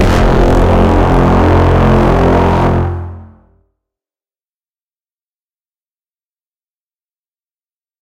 As requested by richgilliam, a mimicry of the cinematic sound/music used in the movie trailer for Inception (2010).
cinematic, horns, inception, request, stab, trombone